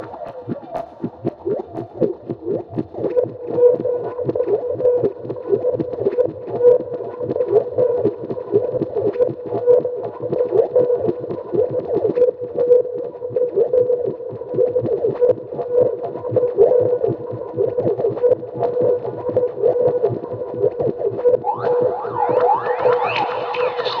80 bpm Trance 23rd Century Attack loop 7
ATTACK LOOPZ 01 is a loop pack created using Waldorf Attack drum VSTi and applying various Guitar Rig 4 (from Native Instruments) effects on the loops. I used the 23rd Century kit to create the loops and created 8 differently sequenced loops at 80 BPM of 8 measures 4/4 long. These loops can be used at 80 BPM, 120 BPM or 160 BPM and even 40 BPM. Other measures can also be tried out. The various effects go from reverb over delay and deformations ranging from phasing till heavy distortions.